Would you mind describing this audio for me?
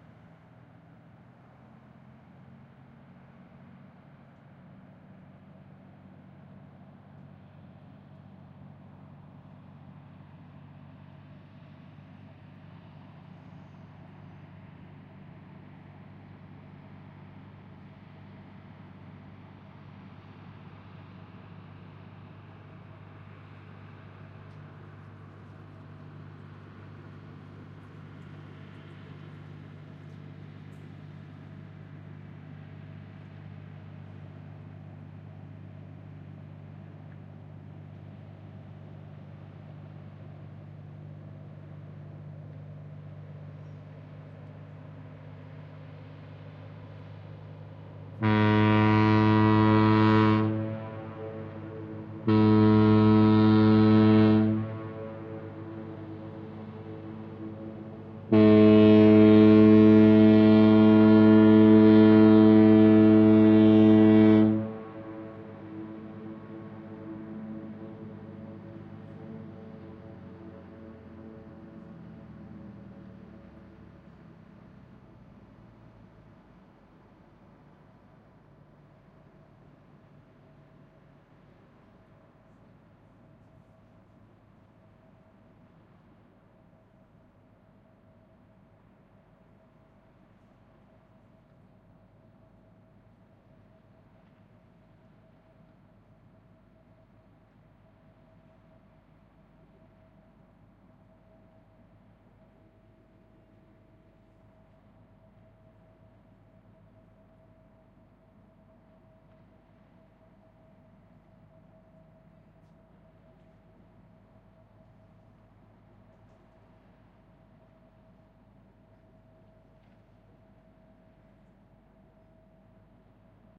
Sounds of a ships fog-horn at close range (about 200 meters). This is part of a 3 recording set. The ship made the same sound three times as it came up a harbour. This recording was made at night. Temperature 5 degrees C. The horn reverberates off the surrounding hills.